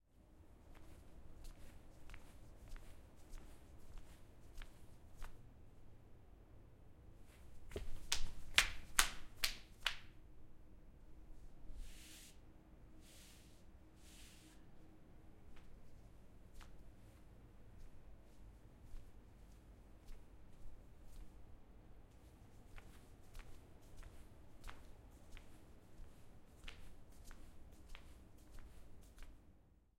Walking variations, running
Recorded with a zoom H6, walking on the spot, variations. Turning around and running.